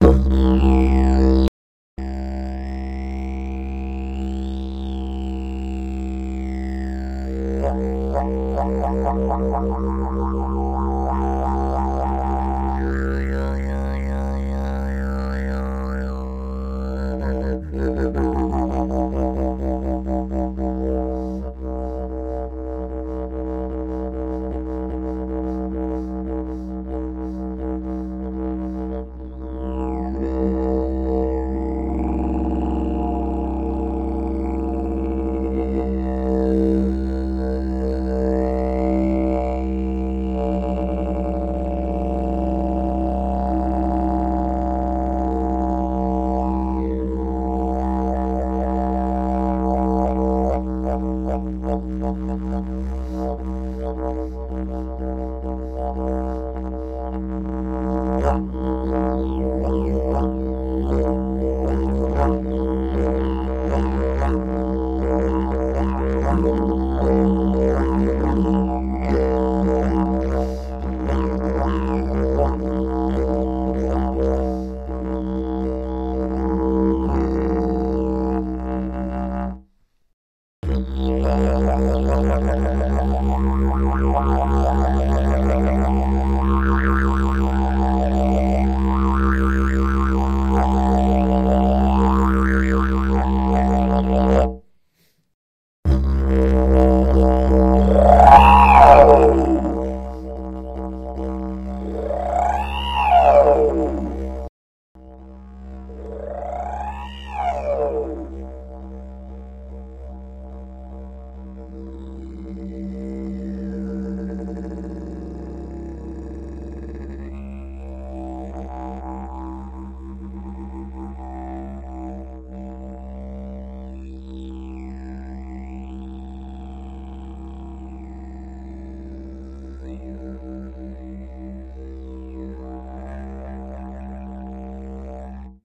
Jimmie's Didgeridoo
Didgeridoo performed by Jimmie P Rodgers, recorded with a Zoom H2 at various Mic Gain levels.
aerophone, australia, australian, brass, cylinder, Didgeridoo, didgeridoos, didjeridu, drone, indigenous, instrument, kakadu, music, musical, pipe, plastic, trumpet, wind, wood, wooden